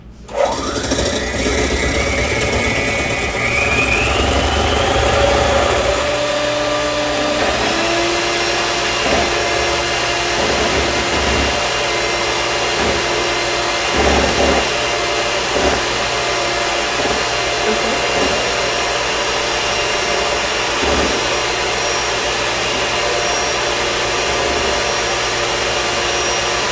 This is the sound of whipping cream in a metal bowl with a hand mixer. Recorded with a boom microphone onto a P2 card via a Panasonic HVX200 digital video camera.
whipping, cream, whipped, mixer